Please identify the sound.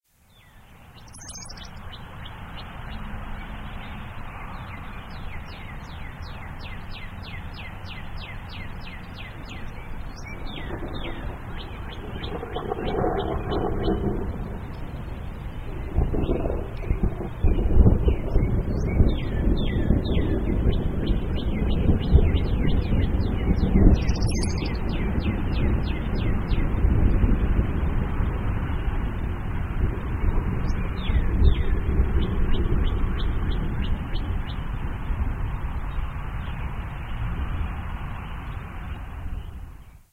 Med Distant Thunder Birds with Light Traffic
Thunderstorm in distance, evening birdsong with light traffic.
field, thunder, bird, traffic, recording, song